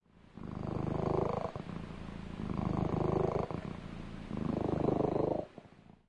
Blissful Kitty Purring Loudly
This kitty purrs loud enough to wake me up in the middle of the night. (She registers about a 6.4 on the Richter scale.) If you're looking for the sound of a VERY happy cat, this is it.
loud, loudly, kitty, contented, blissful, happy, purring, cat